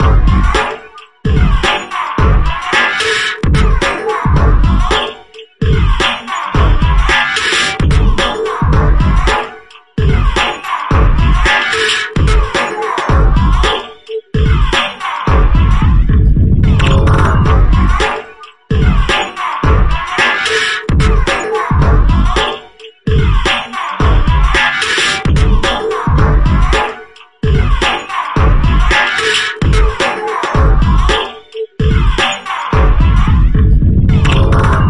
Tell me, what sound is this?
Drumbeat processed with a spectral Plug In (Spectron) at 110 Bpm